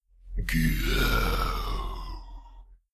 Monster Growl 01
The growl of a monster.
growl, zombie, scary, horror, monster, creepy